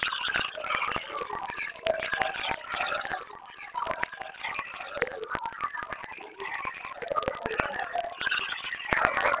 Ghosts auditioning for American Dead Idol.
synth, voice, grains, granular, ghost